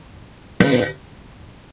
fart poot gas flatulence
fart, flatulence, gas, poot